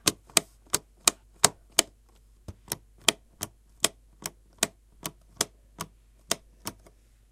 light switch3
Essen, Germany, January2013, SonicSnaps